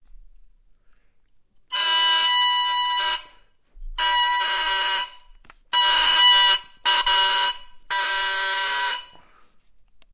I recorded the sound of the oboe reed I made by myself in my house , in Rome , on September 12 . I recorded with a Tascam DR - 05 .
instrument, oboe, orchestra, reed, woodwind